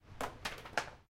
West Stacks Book falling 2
This sound is of a book falling down the staircase in the Stanford University library west stacks
falling, stacks, book, stanford, library, dropped, drop, stanford-university, aip09, stairs